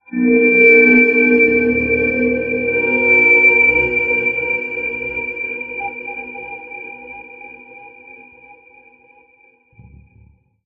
Samurai Jugular - 32
A samurai at your jugular! Weird sound effects I made that you can have, too.
dilation, effect, experimental, high-pitched, sci-fi, sfx, sound, spacey, sweetener, time, trippy